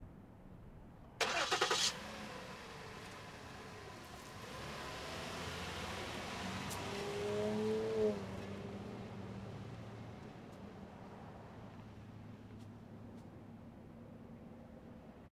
Car Start Drive 1
2007 Ford Edge starting and driving away in a parking lot.